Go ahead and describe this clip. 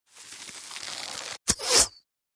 FX bow&arrow